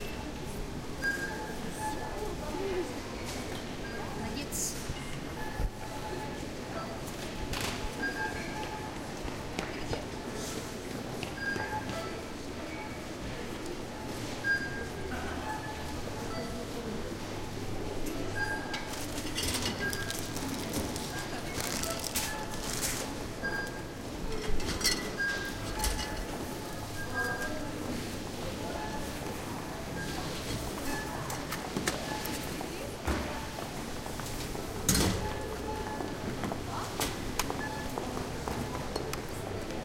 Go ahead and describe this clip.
interior sales area supermarket, noise equipment, footsteps, area near the cash registers, no background music. Kiev, Ukraine, 2010, Zoom H2